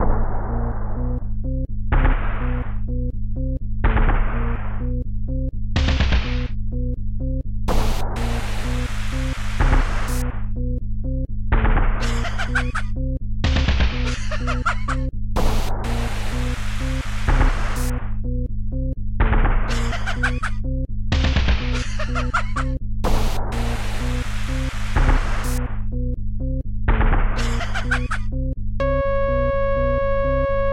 STIRRING UP A NUTTER
A simple tune which is different but catchy. I was going to title it "Rumour has it this is your mentality" or "I heared you
muttering", but I did consider "News to me", but then again "Don't Panic" or "Army of Nutters" and finally "London Ghost Train". I hope you enjoy it as much as I have enjoyed making it.
- recorded and developed August 2016.
trance, electronic, electro, bounce, rave, drum, game, club, ambient, dub-step, drum-bass, glitch-hop, hypo, waawaa, blippy, game-tune, intro, effect, synth, experimental, loop, dub, beat, bass, dance, loopmusic, gaming, Bling-Thing, techno